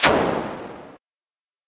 AO Subtractor Snare 1 1
Inspired by a discussion on the Ambient Online forum:
Used the Subtractor synth from Reason.
Please refer to the pack description for a full list of the sounds synthesized.
AO_Subtractor_Snare_1_1
Just a burst of noise in Reason Subtractor with a Low-pass filter.
Noise decay 127 (max 127), noise color 76 (max 127), noise level 127 (max 127).
Amp envelope is decay only, decay = 60 (max 127)
Filter env is also decay only, decay = 30 (max 127), amount 127. Filter set to Low-Pass 12, freq 57 (max 127), Res 0.